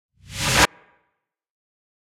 woosh fx 1
woosh sfx sound, usefull for video transition. from several sample that i processed in ableton live.
there is a short reversed sound with some reverb
transition, sfx, noise, fx, sound, reverb, reverse, white, short, woosh, video, effect, woush